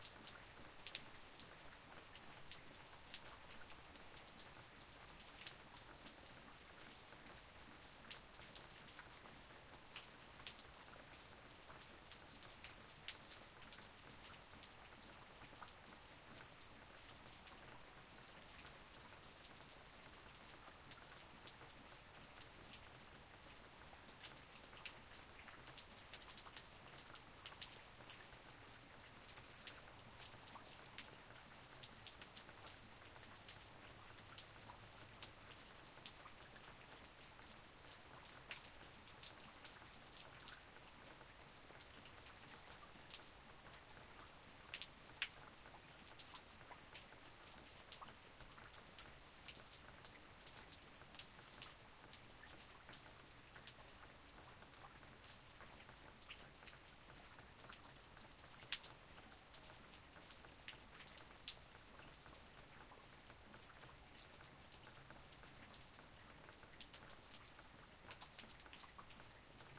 outside-rain-light1
Very light rain as heard from outside on my front porch.
atmosphere, california, rain, suburb, thunderstorm